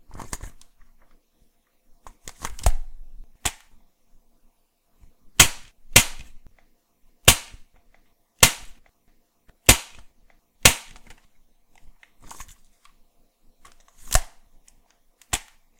A recording of a toy gun being fired.
mag, shoot, toy, magazine, bb-gun